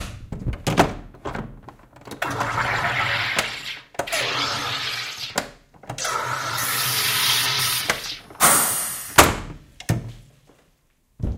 Using a soda stream to fizz up a bottle of water. File conaind a gas hiss and some clunks as the bottle is put in and removed.